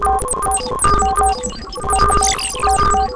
firstly i've created a few selfmade patcheswith a couple of free virtual analog vsti (synth1 and crystal, mostly)to produce some classic analog computing sounds then i processed all with some cool digital fx (like cyclotron, heizenbox, transverb, etc.)the result is a sort of "clash" between analog and digital computing sounds